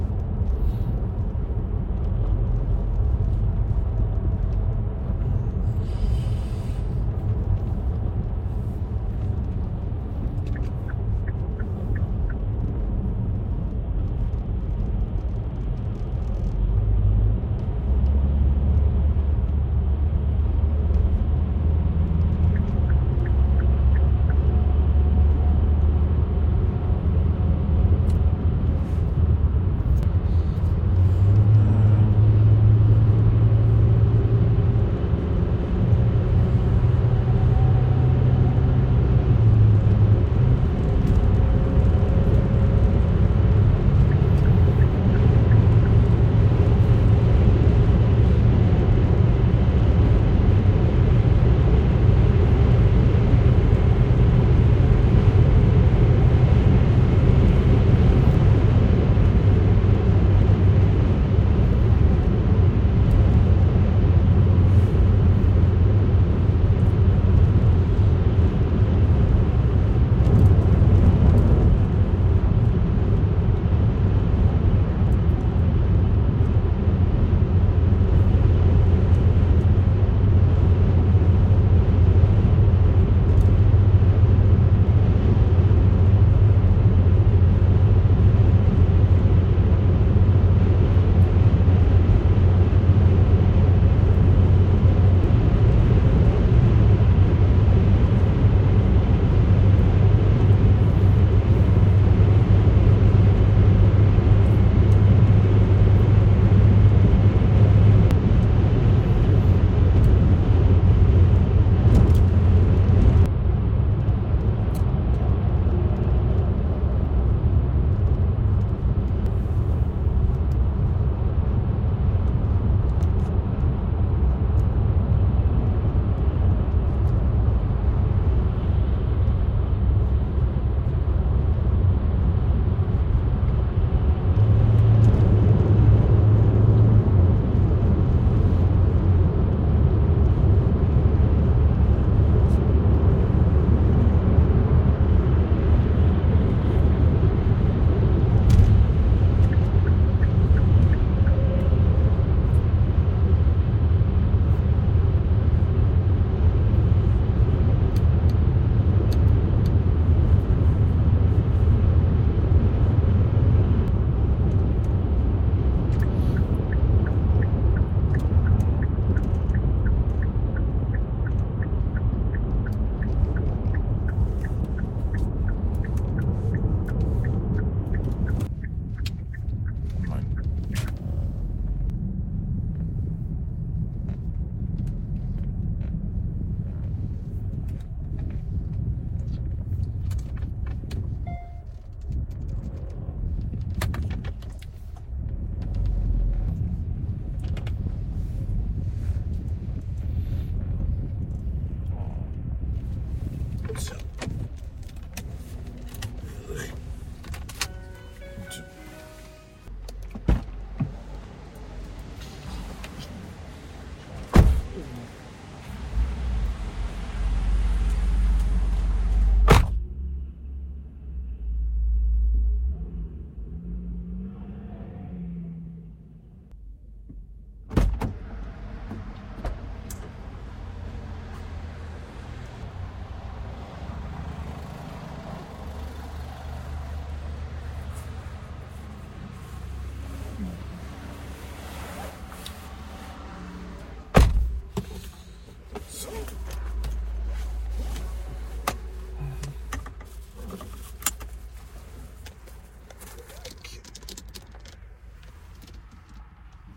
Hyundai I40 1.7 CRDI Fahrt
This is a highway drive with my Hyundai I40 1.7 CRDI getting off the highway stopping at a gass station, getting out of the vehicle and back in. Have fun with it!